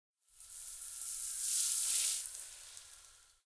water sea spray H07
A hose spray nozzle spraying while passing the mic. Can be used as sweetener for sea spray hitting the deck of a ship.
hose, nozzle, sea-spray, ship, spray, water